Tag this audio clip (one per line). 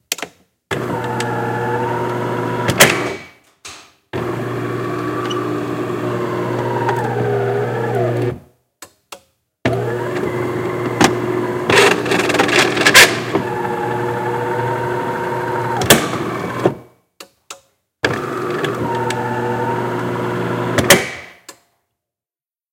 Industrial
Machinery
Mechanical
Metal
Metallic
Robot
Scifi